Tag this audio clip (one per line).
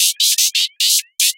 strange
future
digital
sounddesign
machine
electronic
freaky
glitch
sound-design
effect
abstract
weird
sound
soundeffect
noise
sci-fi
loop
fx
sfx
lo-fi
electric